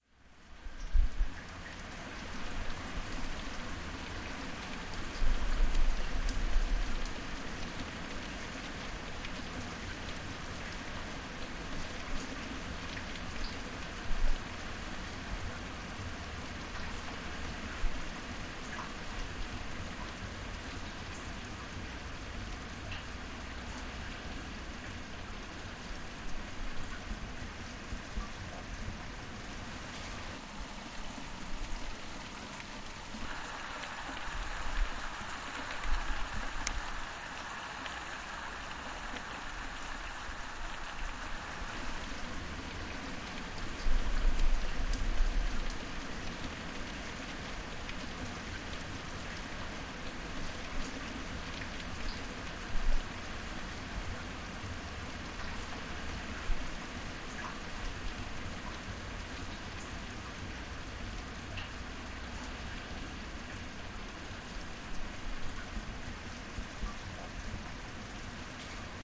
Rain In The City
A heavy rain shower in a city.
shower, rain